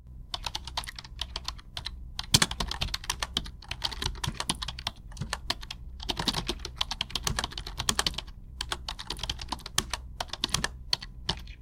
AGUILLON Anastasia 2018 2019 keybord
This is a recorded sound about someone typing on the computer keyboard. For the recording to be clean, I first recorded the ambient sound. So, I was able to capture the "noise" of the recording and then delete it. I later applied the normalization effect to stabilize the sound.
Analyse selon la typologie de P. Schaeffer :
Il s'agit d'une itération complexe X’’.
1. Masse : il s’agit d’un groupe nodal de sons où nous retrouvons plusieurs sons complexes.
2. Timbre harmonique : Le son est plutôt éclatant : il surprend, il est clair, court, rythmé.
3. Grain : Le son est lisse, nous ne ressentons aucune texture particulière.
4. Allure : Aucun vibrato dans le son du clavier, il est clair et régulier.
5. Dynamique : L’attaque de chaque bruit de touche et abrupte. Le son est court et violent.
6. Profil mélodique : Ne s’applique pas
7. Profil de masse : /
office, computer, typing, keyboard